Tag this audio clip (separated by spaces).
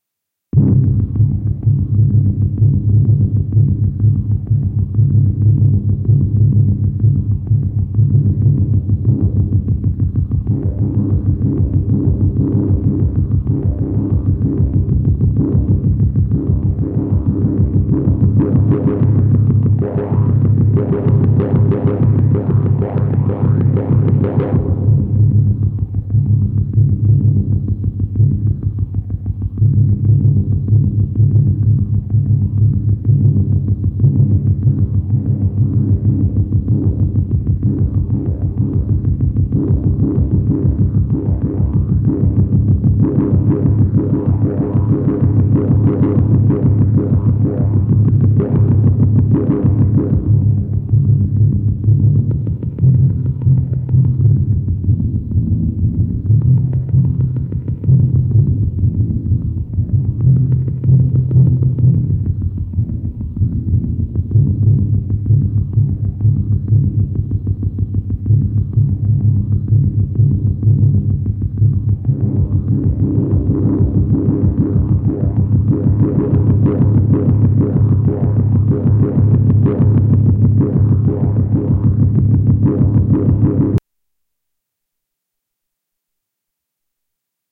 scary background synth suspense